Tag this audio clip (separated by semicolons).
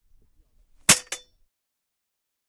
Office; Squeaking